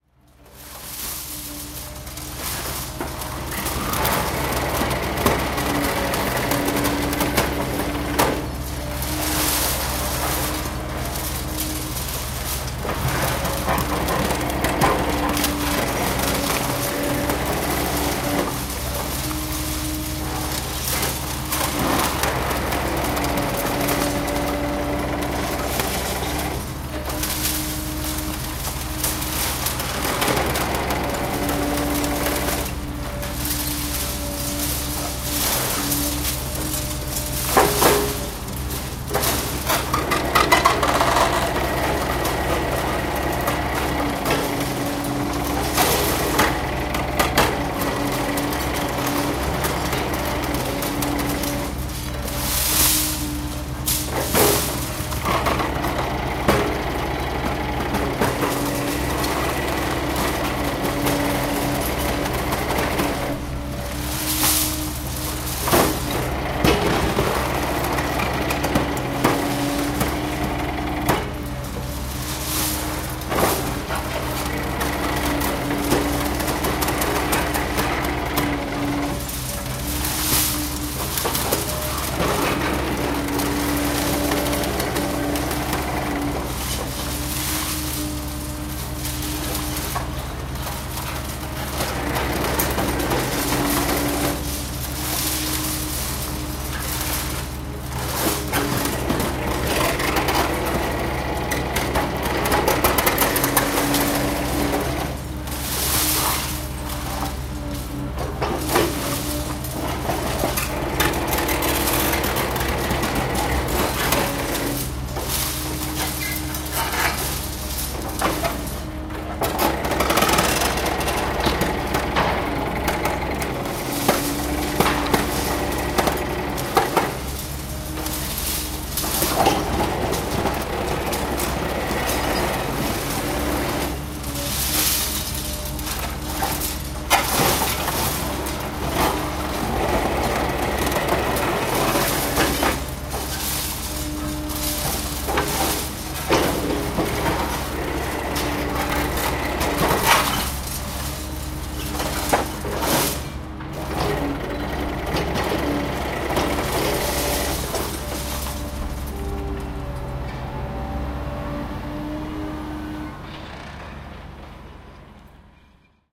An excavator is sorting demolition waste on a demolition plant in the suburbs of Paris, France. It's mainly separating steel elements from concrete.
93, crane, demolition, destruction, excavatordemolition-waste, France, Pantin, Paris, Seine-Saint-Denis